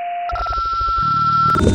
backwards sample clicks beeps
backwards, beep, clicks, computer, keyboard, mix, phone, processed, sample